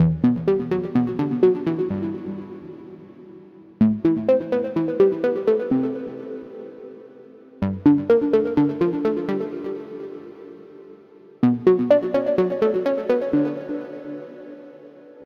A hookline I made with Alchemy 1.5 in Numerology